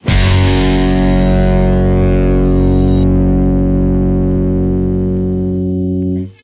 06 F# death metal guitar hit
Guitar power chord + bass + kick + cymbal hit
bassGuitar, blackmetal, chord, death, deathmetal, guitar, hit, metal, power, powerchord